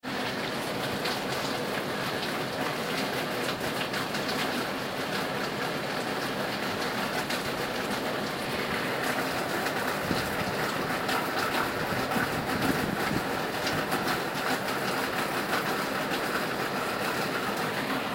Could be used for a conveyor belt
belt, conveyor, low, machine, whirr